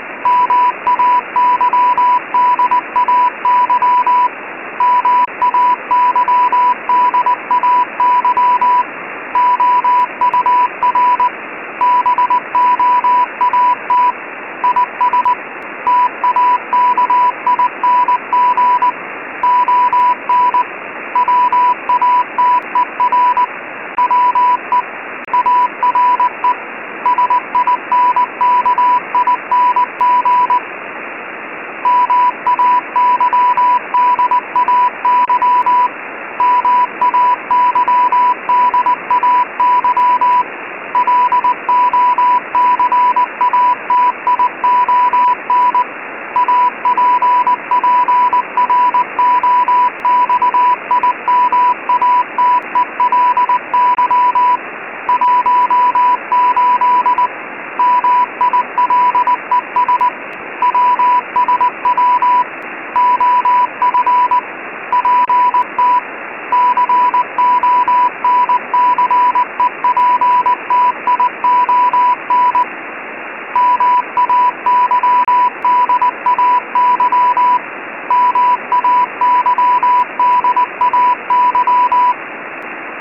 Morsecode - MAYDAY Boat Sinking - 988 Hz Tone - With Static
Emulated Morsecode radio transmission. Coded message reads as follows: "mayday mayday our boat is taking on water we are sinking mayday mayday location approximately 18 miles wsw of pt conception mayday mayday."
beacon, code, distress, mayday, morse, morsecode, radio, radio-transmission, sinking-boat, static